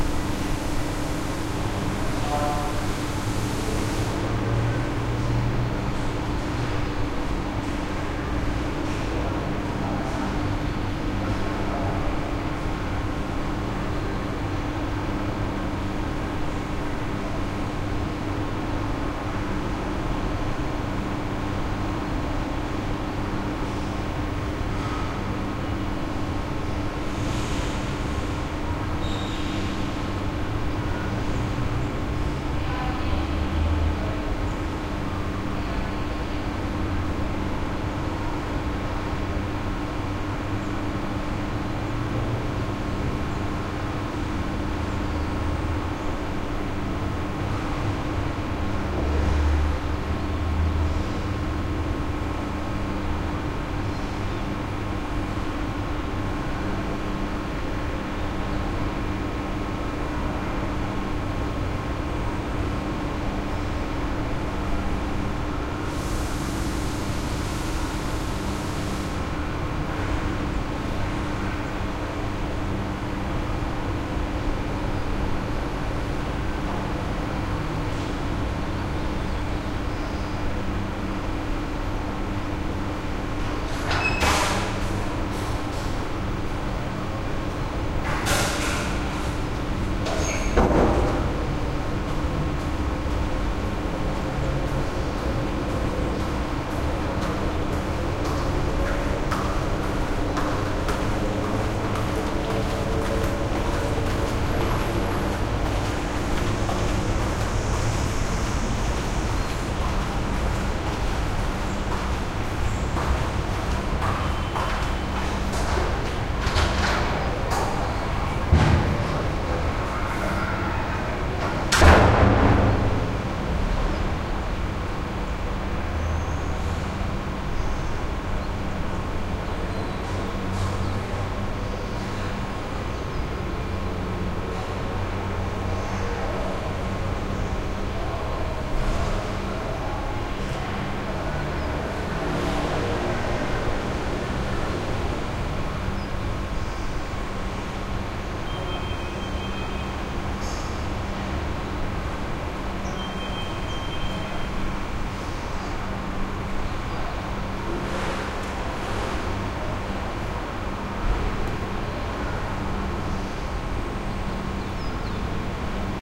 Thailand hotel hallway heavy some ventilation +distant city skyline, traffic, hotel activity, birds, voices, guy walk by and doors open, close